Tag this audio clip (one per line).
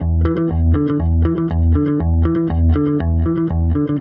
120
120bmp
bass
bmp
disco